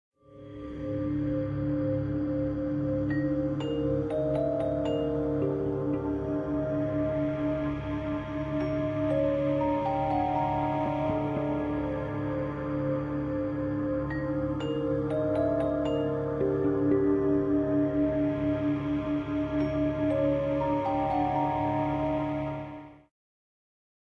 Spooky Music
A short scary music box background track.
horror, scary, spook, child, toy, box, background, bell